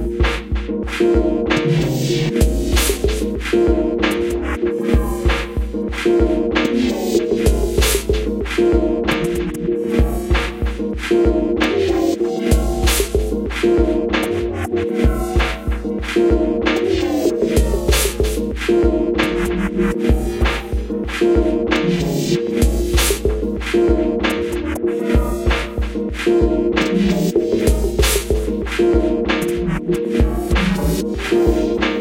MA CrazyRhytms 5
Sound from pack: "Mobile Arcade"
100% FREE!
200 HQ SFX, and loops.
Best used for match3, platformer, runners.
abstract, digital, effect, electric, electronic, freaky, free-music, future, fx, game-sfx, glitch, lo-fi, loop, machine, noise, sci-fi, sfx, sound-design, soundeffect